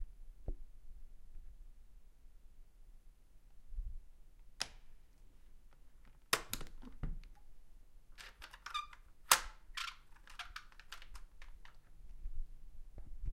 Door front, closing 2
My current apartment door closing and locking.
foley, Door, closing, lock, click